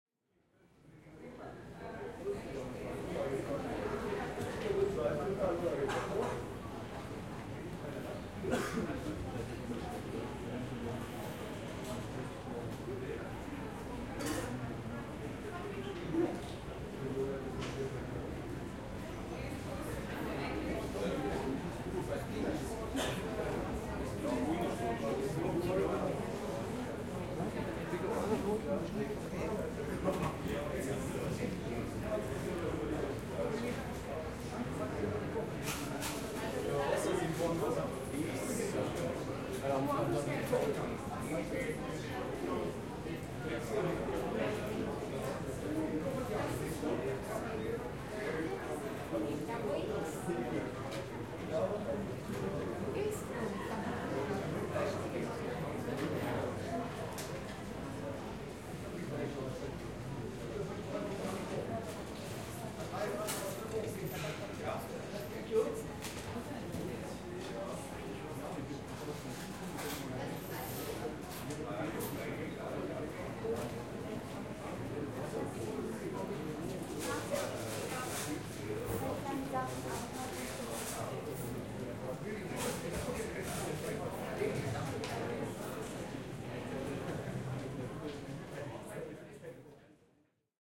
Stadt-Landshut-Kollonaden-Summer-2017
people walking and talking in the collonades of Landshut, Lower Bavaria, Germany. Recorded with Zoom H4n (built-in stereo microphones)
ambiance, ambience, atmosphere, city, general-noise, open, people, soundscape